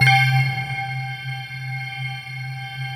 Made up by layering 3 additive synthesized spectrum sounds ran them through several stages of different audio DSP configurations. FL Studio 20.8 used in the process.
bell, bells, chime, church-bell, clang, clanging, dong, gong, metal, metallic, natural, organic, ring, ringing, synth, synthesis